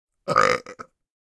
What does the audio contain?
Burp, Male, Short, A
I was recording some dialogue and felt a tinge of fear start to develop amidst my stomach. The dread grew exponentially as the lurking horror crept its way up and escaped through my mouth to become sound you are now hearing. The shockwave rippled for miles, injuring several innocent bystanders and causing a mass panic. I'm still paying the damages.
An example of how you might credit is by putting this in the description/credits:
And for more awesome sounds, do please check out my sound libraries.
The sound was recorded using a "Zoom H6 (XY) recorder" on 10th April 2018.